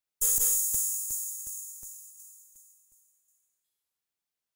I used FL Studio 11 to create this effect, I filter the sound with Gross Beat plugins.
sound-effect, freaky, future, fxs, computer, electric, robotic, lo-fi, sound-design, fx, digital